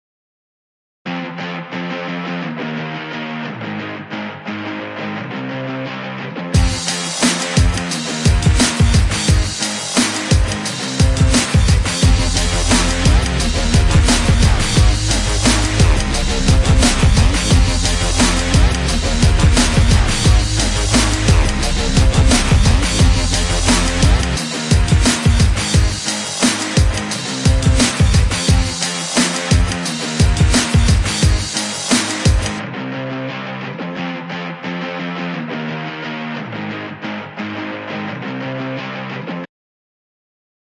Guitar with beat 1
This would be quite good for an intro I think.
Made on Groovepad.
It would be awesome if you could tell me if you use this sound for anything (you don't have to of course). :D
dj, drums, groovy, Guitar, intro, loop, rock